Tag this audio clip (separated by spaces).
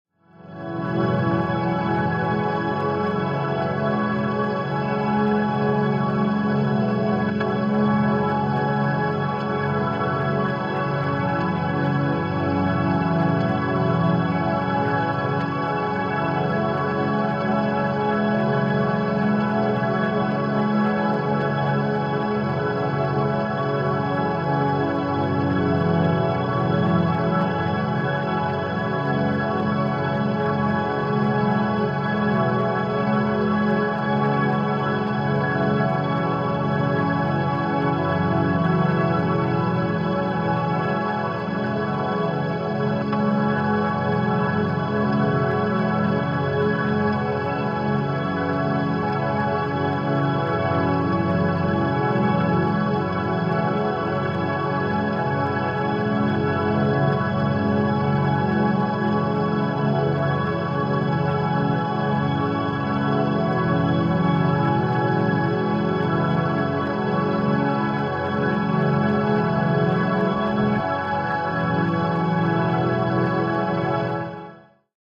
experimental delay